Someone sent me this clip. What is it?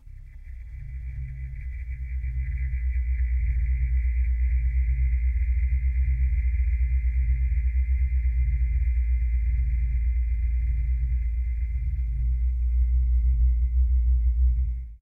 Recording of cell-phone feedback overlayed with bass noise, and a stretched kick drum. Delay. Reverb and Noise Reduction. Fade in, fade out.
airy, background, bass, bassy, cinematic, effect, fade, fade-in, fade-out, pad